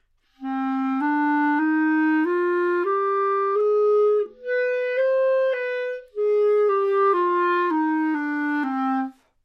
Clarinet - C natural minor - bad-tempo-legato
Part of the Good-sounds dataset of monophonic instrumental sounds.
instrument::clarinet
note::C
good-sounds-id::7739
mode::natural minor
Intentionally played as an example of bad-tempo-legato
clarinet, minor, scale